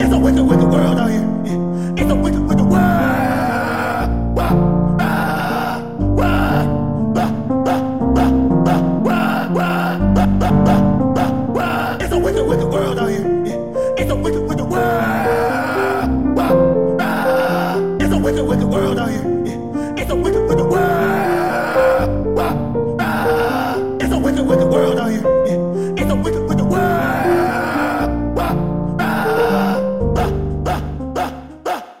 cyber kanye not kanye
i used asotus sad piano with hittalyxs vocal stem
asotus, hittalyxs, piano, sad, wicked, world